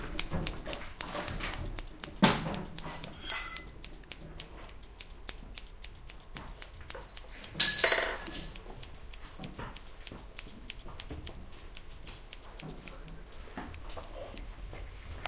opening and closing scissors.
cut, air, scissors